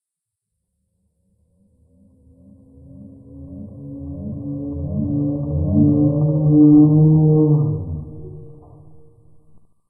phase whale drop
drop, ethereal, moan, phase, whale